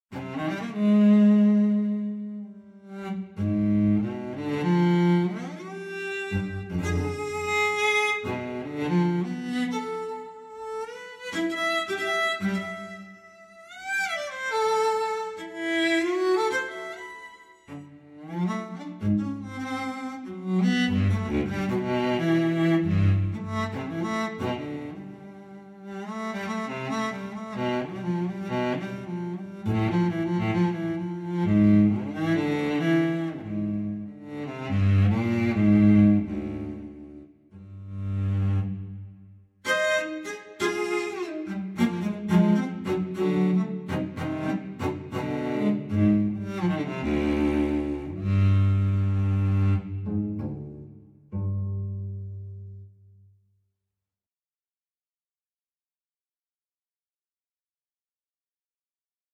Electric cello demo
Near field recording acoustic cello test_small diaphragm condenser mic_Superlux S241/U3_study for mic proximity effect
cello, sample, study, mic, acoustic, proximity, effect